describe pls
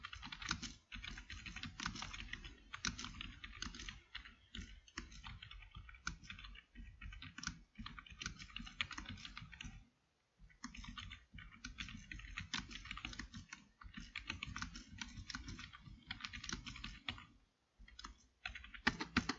Sound effect of a computer keyboard.
Fun fact: You just got Rick Rolled, because I recorded myself typing the chorus of Never Gonna Give You Up.
You can use this sound in any way you want.